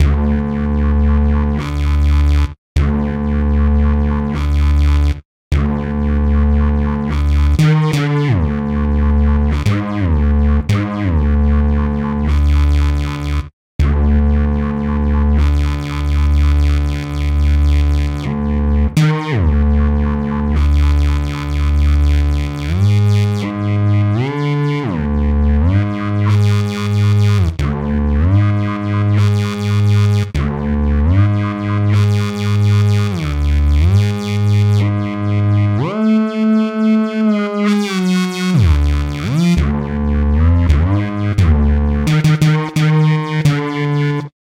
bend bass174
game, hit, digital, music, sample, loop, video, sounds, synthesizer, chords, drum, synth, 8-bit, samples, melody, drums, awesome, loops